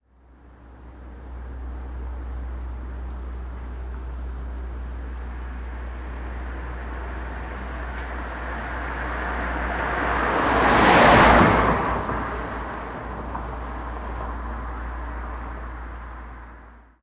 Car by slow HOnda Civic DonFX
Car by slow Honda Civic
pass, by